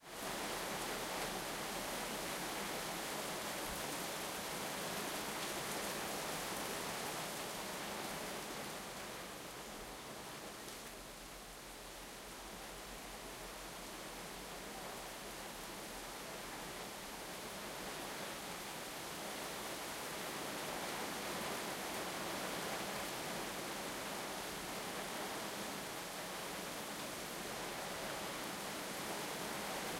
Thunder Rain
thunder heavy rain